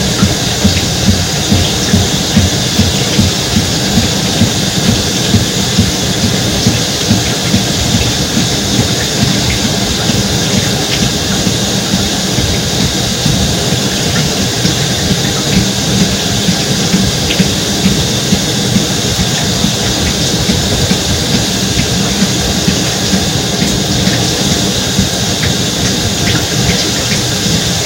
Sounds of a shower running
recorded from the outside
with the curtain up.
It's been edited to smoothly loop over itself.
This sound, like everything I upload here,
dripping, loop, liquid, tub, shower, splashing, atmospheric, free, splash, water, bathroom, bath-tub, shower-head, shower-running, shower-curtain, drain, drip, trickle, bath